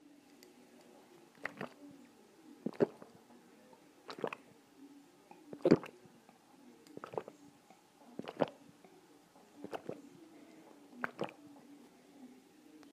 Accion de beber.
Bebiendo, Garganta, Persona